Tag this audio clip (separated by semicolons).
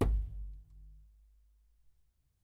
baskagge; basskick; drum; junk; kagge; kick; tom